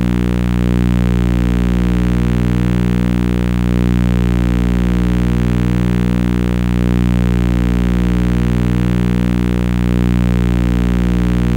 My Alesis QS6 just sitting there blasting me with EMP death rays... recorded with old phone pickup microphone.